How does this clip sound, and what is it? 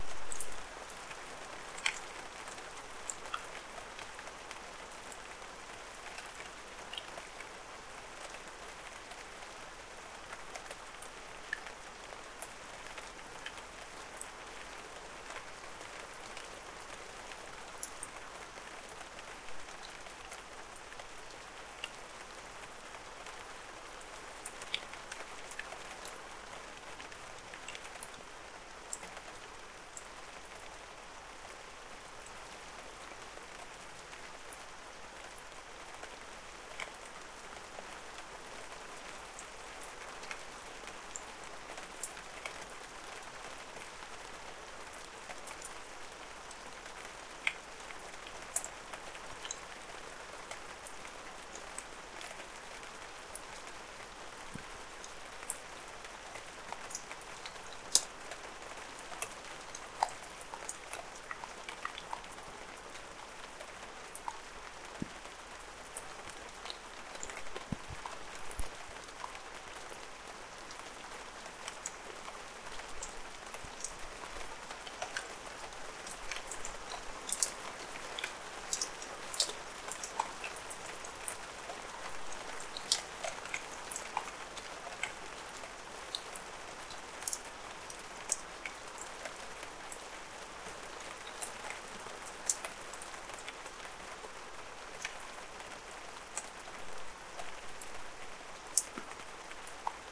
regn august2018
Light rain in august 2018 from my balcony
august, drizzle, rain